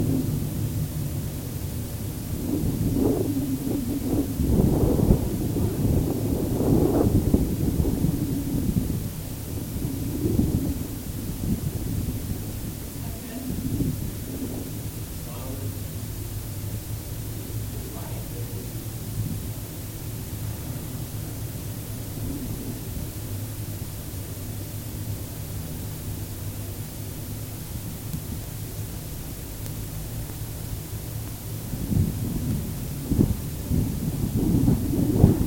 Denver Sculpture On the War Trail
Contact mic recording of bronze sculpture “On the War Trail” by Alexander Phimister Proctor ca. 1920, now located in Civic Center Park in Denver, CO, USA. Recorded February 20, 2011 using a Sony PCM-D50 recorder with Schertler DYN-E-SET wired mic; mic placed near left rear hoof.
bronze, contact, contact-mic, contact-microphone, Denver, DYN-E-SET, field-recording, mic, normalized, PCM-D50, Phimister, Schertler, sculpture, Sony, wikiGong